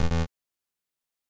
8-bit Deny/Error sound
An 8-bit two-note sound.
Sounds like when you get an error message or when you're denied to do something.
8-bit, 8bit, deny, error, retro, video-game, videogame